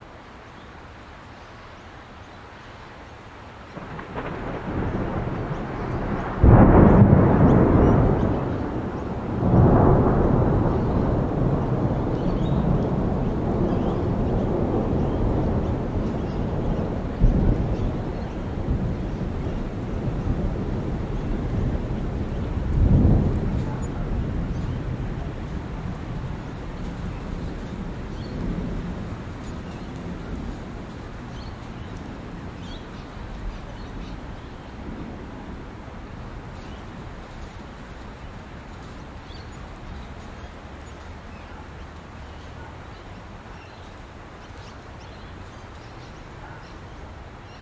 Thunder September 2009
Nothing special, just my usual habit of trying to grab a piece of audio from every thunderstorm that comes my way. Interesting how each storm sounds different. The sounds of parrots and other birds can be heard as the thunder storm rolls in. Recording chain: Rode NT4 stereo mic in Rode Blimp - Edirol R44 (digital recorder).